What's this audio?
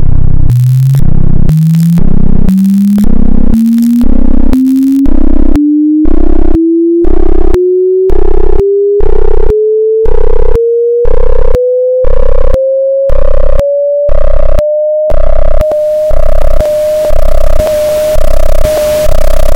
LHOSTE Vincent 2015 2016 montee electronique
///For this sound, I used several sounds generated by frequencies, going crescendo.
I also used three types of noises faded at their beginning.
/// this is a mix between tonic iteration and other noises
Mix between N" and X
No use of an equalizer
/// Morphology
Masse : groupe nodal
Timbre : acide
Grain : rugueux ( due to the presence of white noise )
Vibrato : 0
Profil mélodique : variations scalaires
experimental, mix, electronic, crescendo